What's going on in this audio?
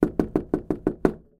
rapid soft knock 01
wood, knocking, knock, fast, door, rapid, knocks, wooden, soft